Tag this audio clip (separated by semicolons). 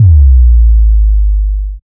electronic; sample; loopmusic; oneshot; dance; deep; dark; sound; loops; drums; hard; trance; ambiance; Techno; Kick; horror; pack; EDM; house; effect; free